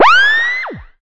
PS003LC 028
This sound belongs to an original soundpack containing 29 samples created through the idea of imagining hidden realms of existence and reality using synthesizers and effects.